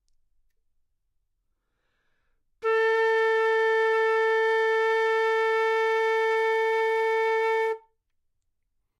Part of the Good-sounds dataset of monophonic instrumental sounds.
instrument::flute
note::A
octave::4
midi note::57
good-sounds-id::2995
A4, flute, good-sounds, multisample, neumann-U87, single-note